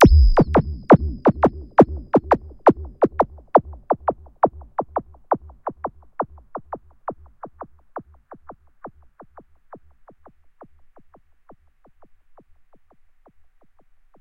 A long delayed 14 second stab.
All my own work.